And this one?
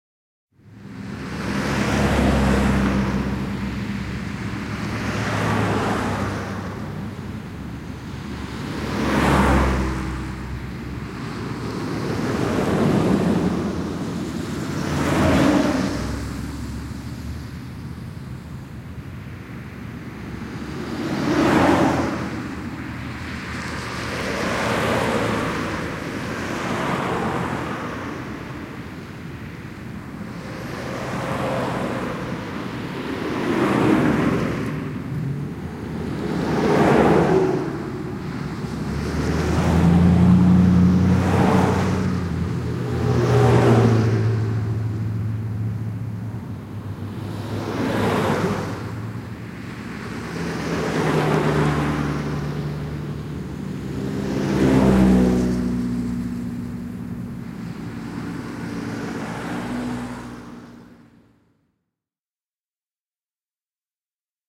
Traffic mel 1
italy, traffic, field-recording, cars, main-street